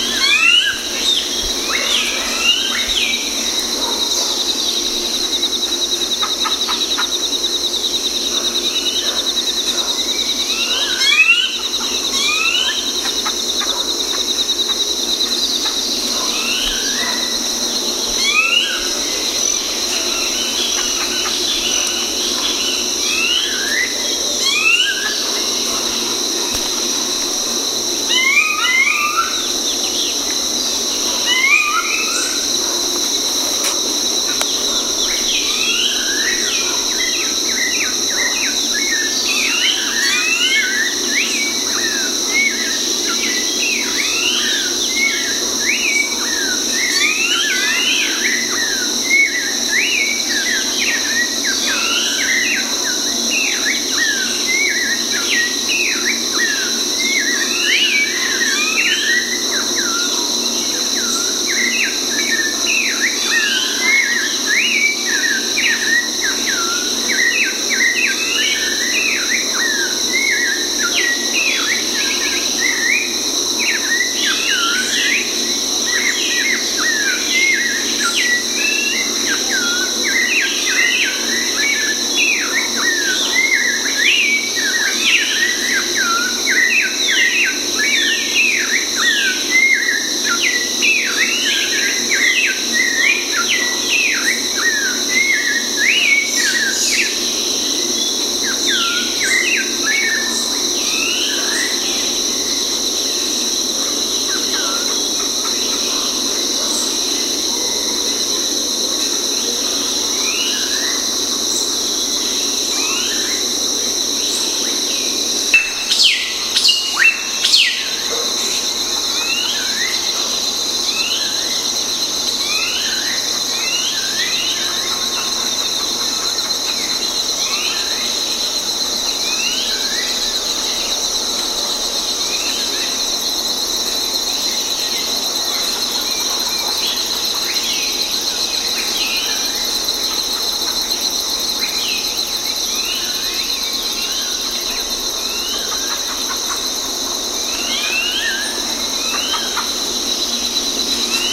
This file is an edited version of this one:
It has been turned into a seamless/sustaining loop. Dynamics have been applied to tighten up the range, in our case so that it can be used in a subtle sound/exhibition installation in our building.